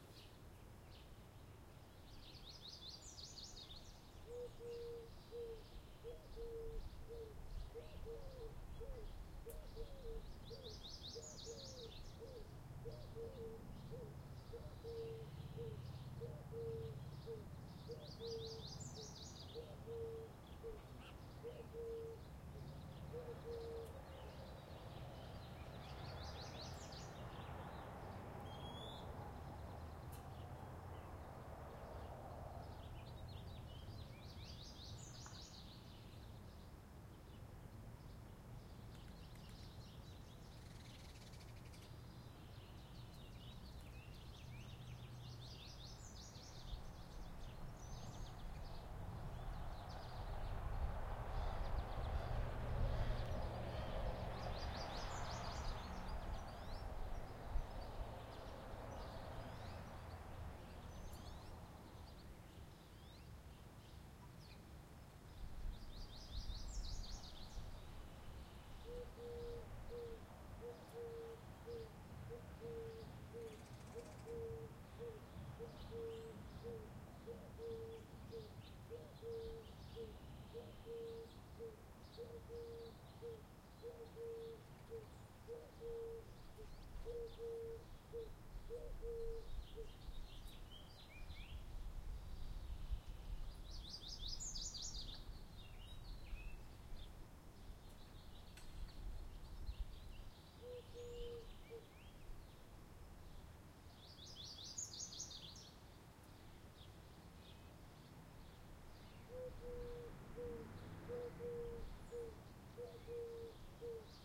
birds chirping
Nature ambience featuring some chirping birds and an occasional car driving by
ambience, birds, chirp, chirping, nature, tweet, tweeting